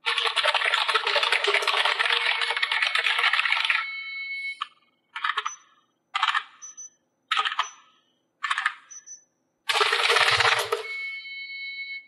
mad keyboard typing
frustrated keyboard typing with error beep
computer, error, keyboard, typing